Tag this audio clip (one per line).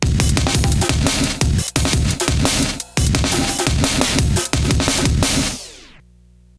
bass
drum
173bpm
hardware
emx-1
heavy